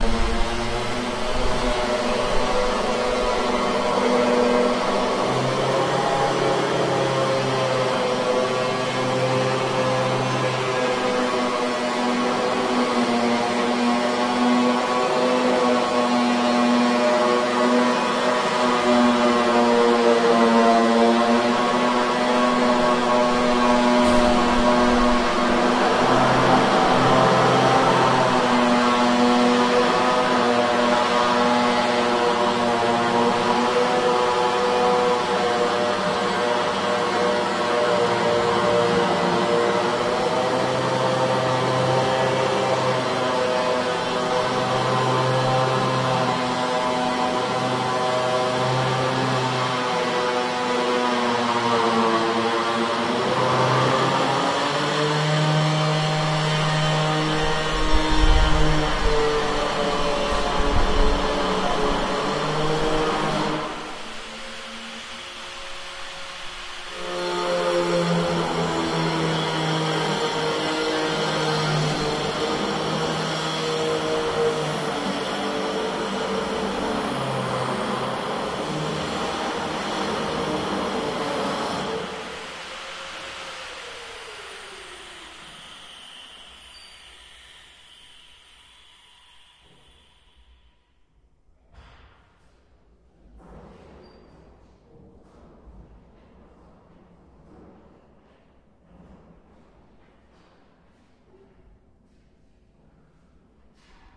Sound of circular saw in the acoustic.
Recorded: 2012-11-11.